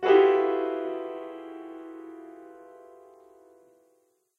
Piano Discord 1
Piano discord.
{"fr":"Dissonance au piano 1","desc":"Dissonance au piano","tags":"piano dissonance note musique instrument"}
discord, note, piano, music, instrument